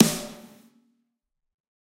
Snare Of God Drier 018
drum, drumset, kit, pack, realistic, set, snare